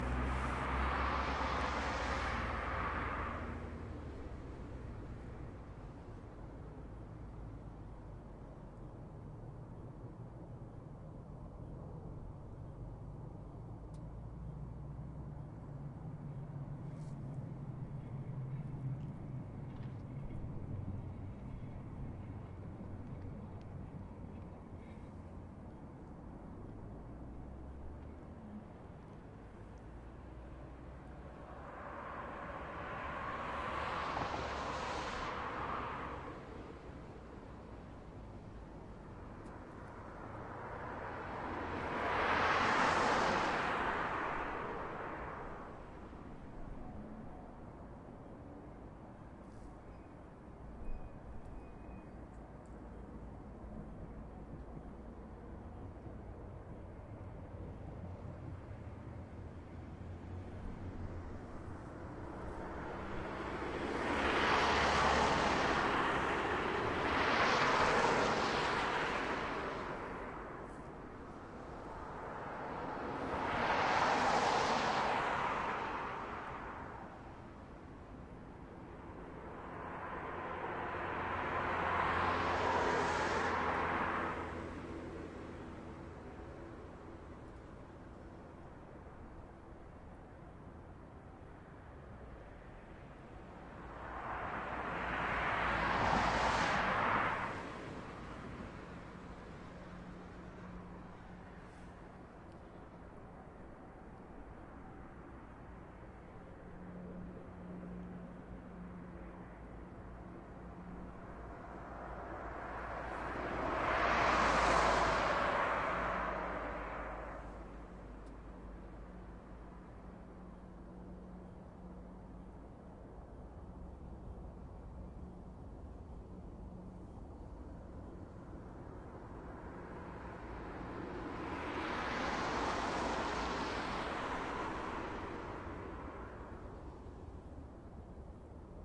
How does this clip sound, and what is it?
Some cars drive slowly on a wet and snowy street.
Record in Leipzig / Germany with a Zoom H2.